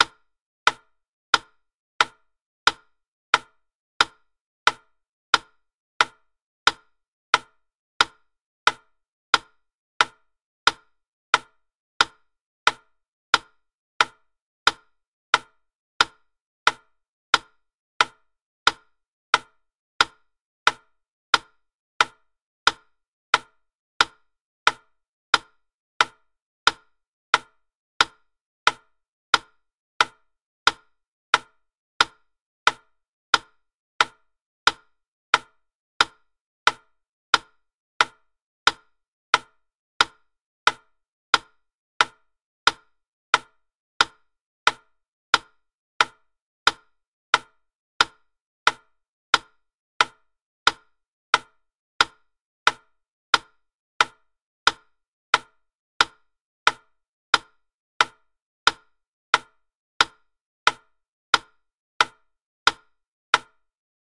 Wittner 90 BPM
Wooden Wittner metronome at 90 BPM, approx 1 minute duration.
90-bpm, tick-tock